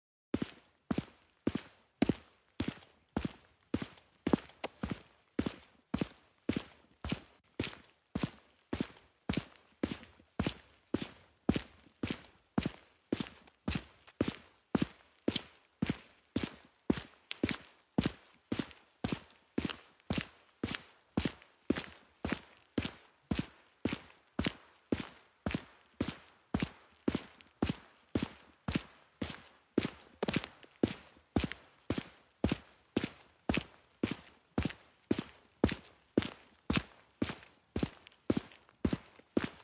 footsteps-concrete-asphalt
asphalt, footsteps, concrete